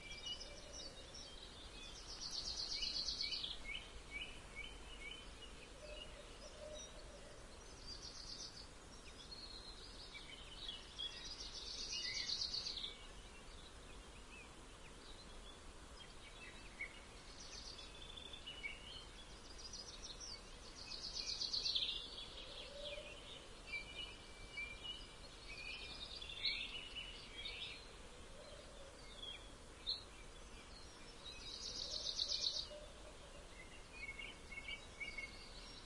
birds-in-spring
Recording done in the forest early in the morning, springtime, outside of Oslo, Norway. Lots of bird activity. Recording made with 2 sennheisser red-dot miniature microphones, custom mounted on a pair of sunglasses.Sharp MD recorder.